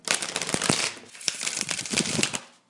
A quick taro shuffle